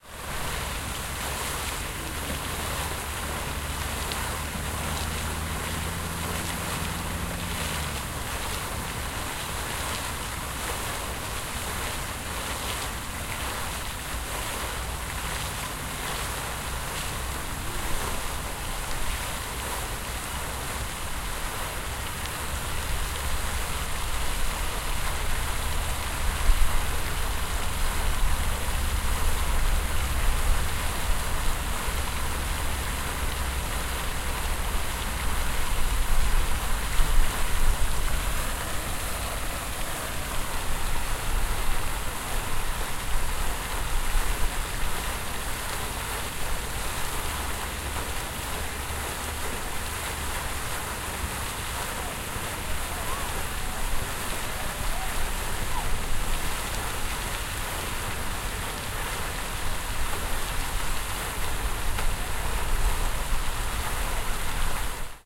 0197 Parque del Rodeo fountain
Fountain in a park.
20120324
caceres, field-recording, fountain, spain, water